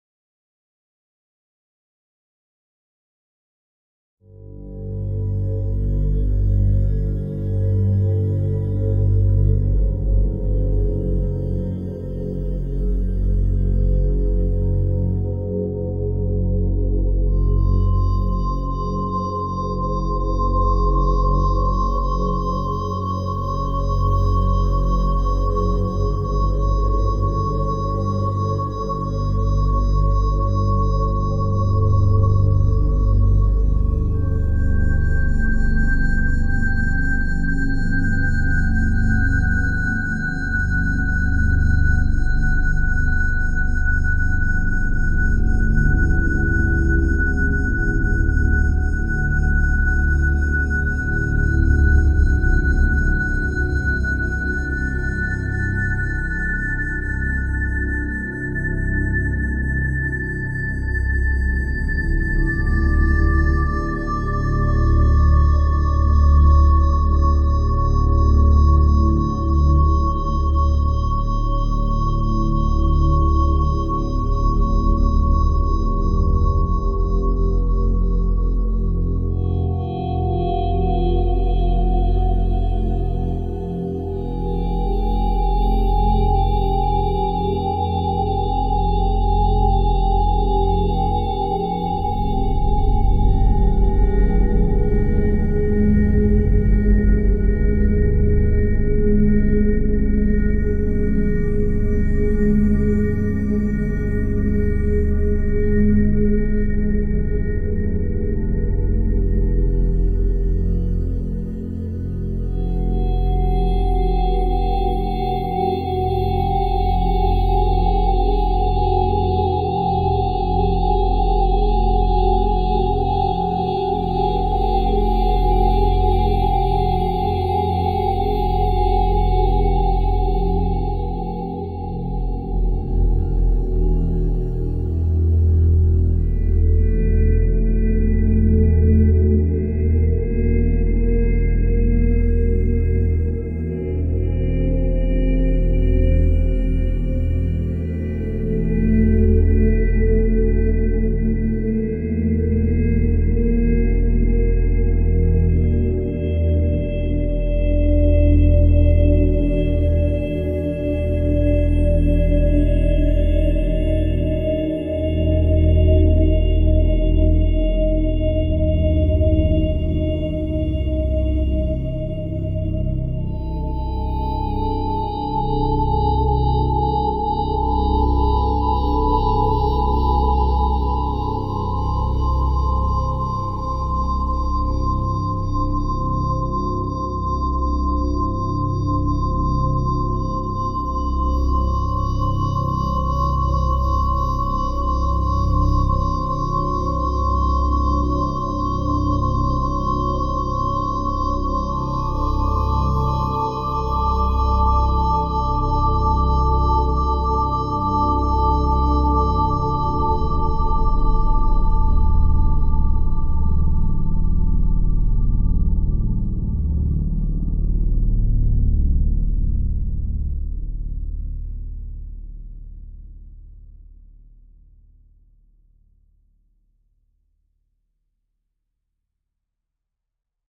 Massive Zebra Drone
A low, evolving drone with a minor second motif, synthesized in Zebra and Massive
massive, zebra, low, minor-second, deep, ambient, evolving, soundscape, drone, space